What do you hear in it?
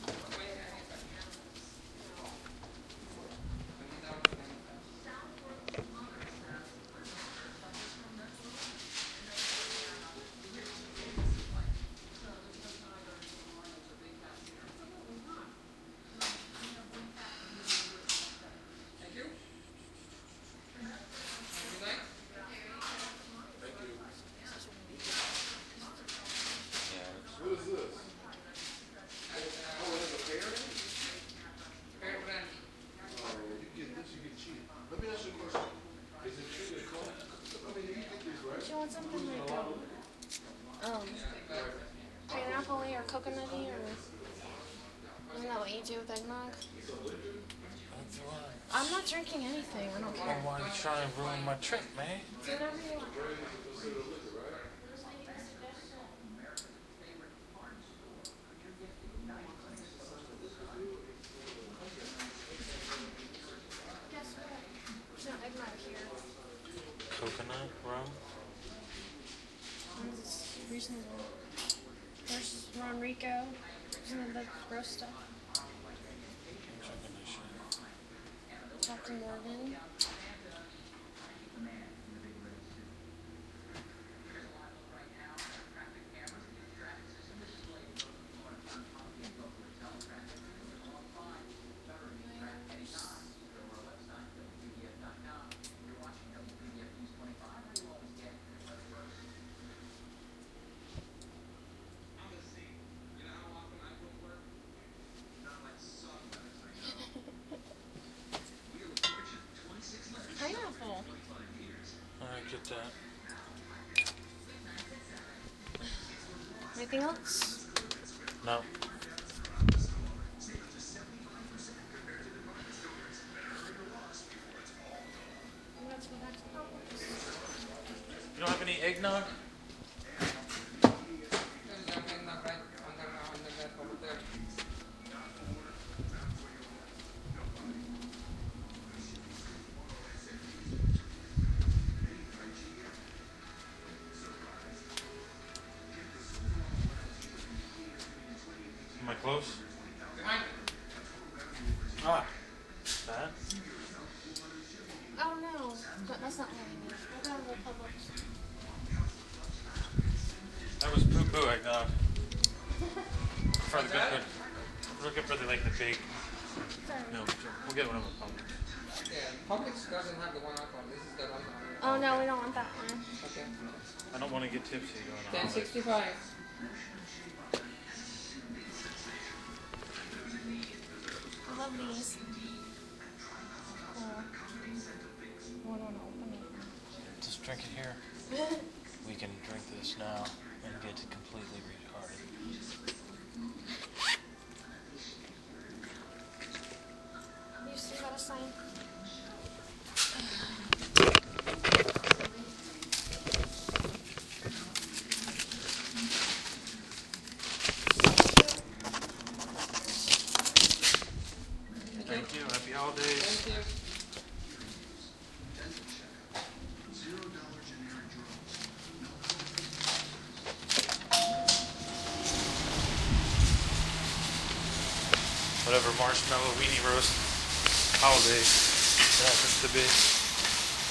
Getting some eggnog to go with the coconut rum recorded with DS-40.